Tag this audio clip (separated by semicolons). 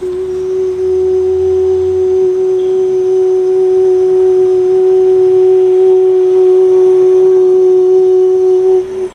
human; male; man; speech; vocal; vocalizations; voice